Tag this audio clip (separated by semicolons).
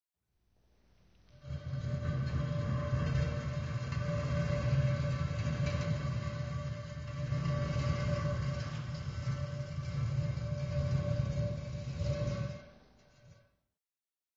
vault; drag; stone; field-recording; grind; crypt; foley; sarcophagus; foley-sound; ground; treasure; scrape; grinding; scraping; dragging; heavy; door; floor; basement